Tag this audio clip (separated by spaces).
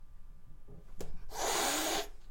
anger cat fury hiss noise pet